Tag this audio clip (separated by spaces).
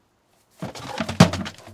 box wooden falls